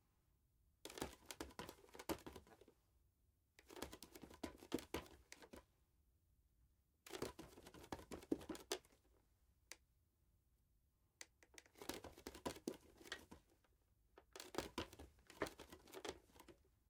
crawling on a wooden floor
crawling, light